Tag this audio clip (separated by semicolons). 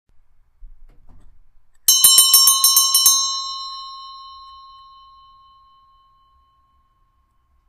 ringing; Bell; ring